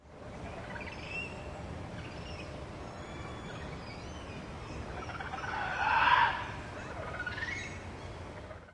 black spider monkey03
Recording of a Black Spider Monkey chattering and screaming. Recorded with a Zoom H2.
zoo, monkey, ape, jungle, primate